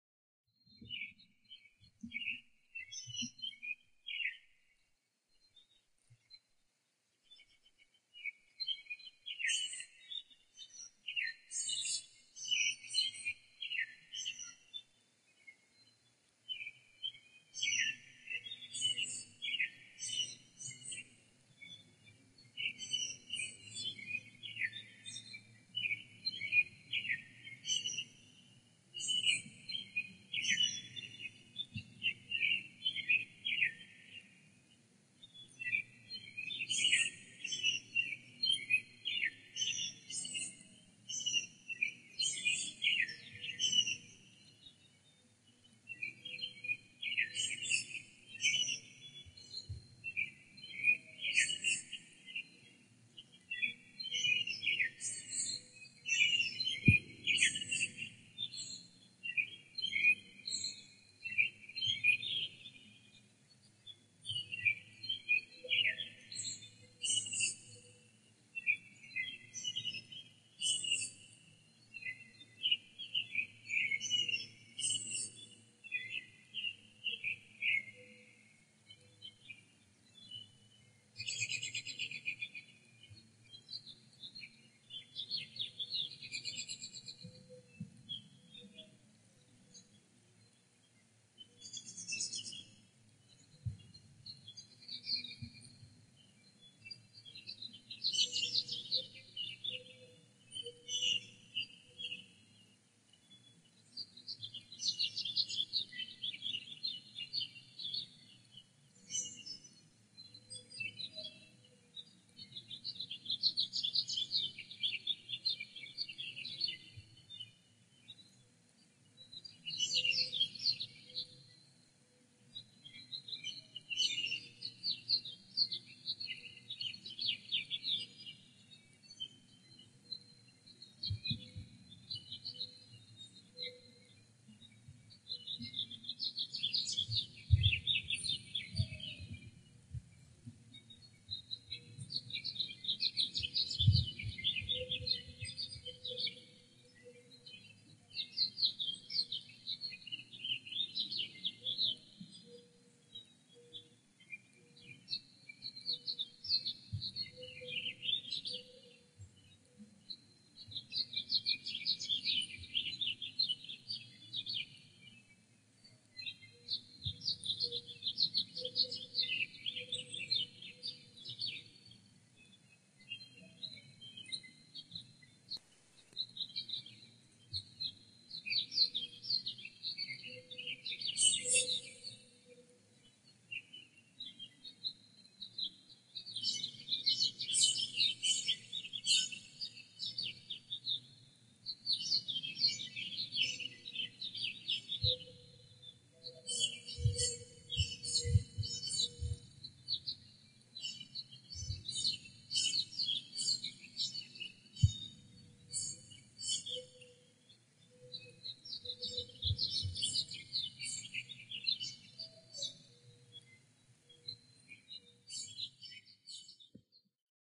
Birds in Lincoln Nebraska at 6 am on 4 23 2016
The sounds of birds at 6 a.m. on a spring morning in Lincoln, Nebraska, USA